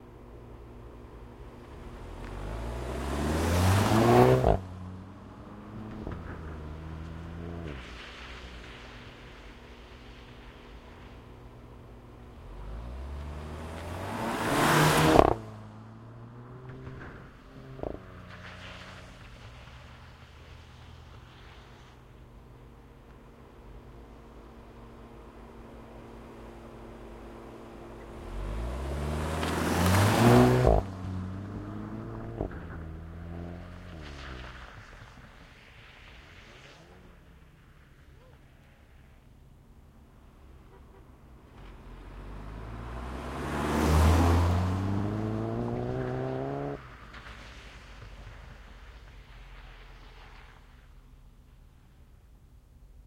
VW Golf GTI Pull Away (Zoom H2n M&S)
VW Golf GTI pulling away. Recorded on the Zoom H2n Midsde.
away, accelerating, accelerate, pull, golf, race, vw, engine, gti, acceleration